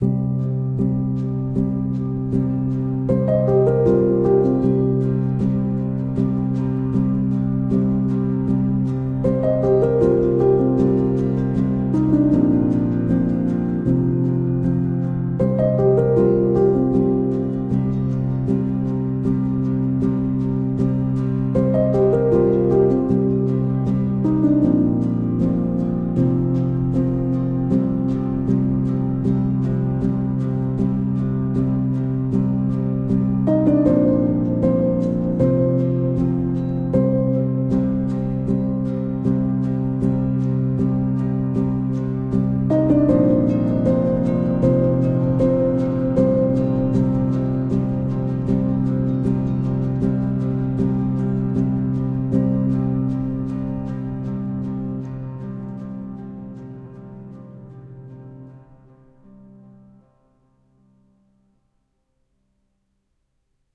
Noir: A Minimalist and Emotive Piano Melody for Relaxation
Introducing Noir, a minimalist and emotive instrumental piano melody. At just one minute in length, this track is perfect for use as a transitional piece or background music in podcasts and other media.
With its focus on minimalist piano and contemporary classical music, Noir is a perfect example of the benefits of minimalist music. Its simple, repetitive melodies and emotive tones create a sense of calm and focus, making it a great choice for meditation, relaxation, or other activities that require a quiet, contemplative mood.
🧡 Thank you for listening.
APPLY THE FOLLOWING CREDIT IF THIS TRACK IS USED IN YOUR PRODUCTION:
📜 USAGE RIGHTS AND LIMITATIONS:
🎹 ABOUT THE ARTIST:
His work is influenced by artists like Vangelis, Jean Michel Jarre, KOTO, Laserdance, Røyksopp, Tangerine Dream, and Kraftwerk. He is known for creating immersive musical experiences.
First and foremost, I would like to show my gratitude to you! My music would be meaningless if it weren't for you.